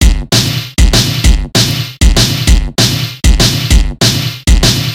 A set of Drum&Bass/Hardcore loops (more DnB than Hardcore) and the corresponding breakbeat version, all the sounds made with milkytracker.